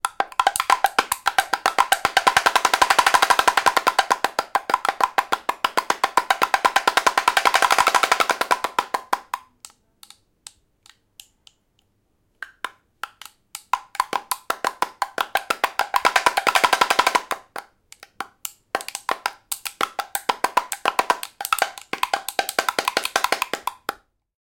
percussive sound of a Chinese small tom
tom, chines, small